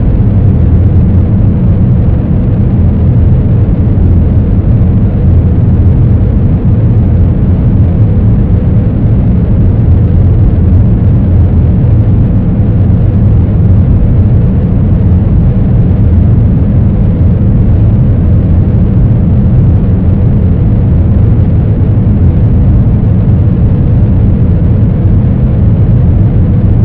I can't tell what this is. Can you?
Recording of an air vent processed in Pro Tools.